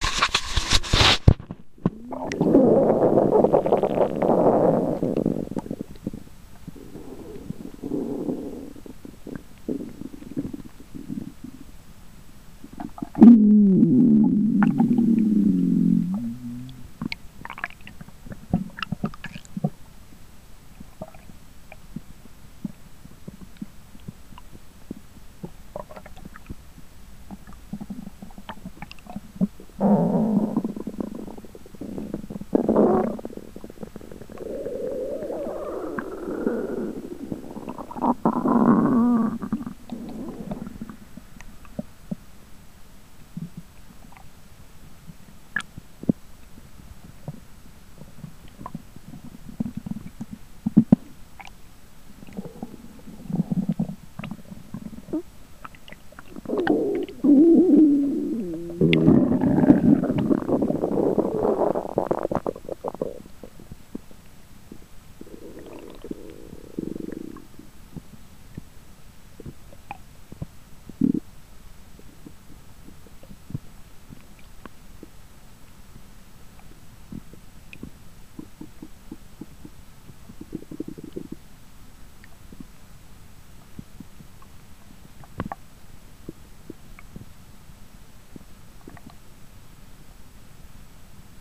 belly monologue stereo
If anybody can interpret Belly, the original ancient language of the human torso, please share your insights.I always wanted to know what it is saying, and linguaphone doesn't have a Belly-course for the offering.